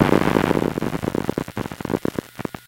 hdd external folder-access long
A longer burst of data access on an external 3.5 inch USB hard drive recorded with an induction coil.